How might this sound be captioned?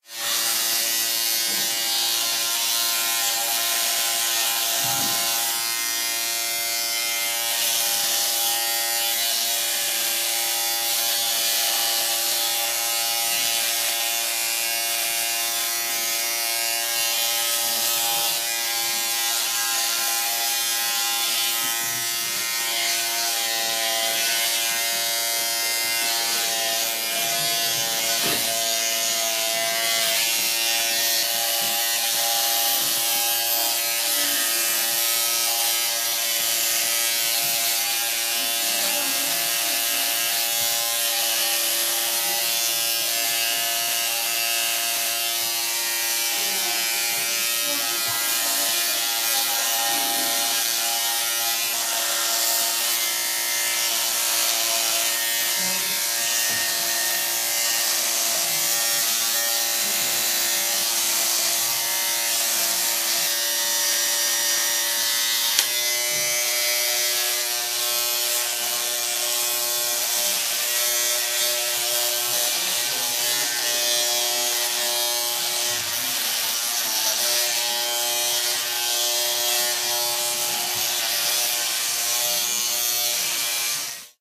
Sound of shaver during shaving - two modes included.
bathroom, face, hair, shaver, shaving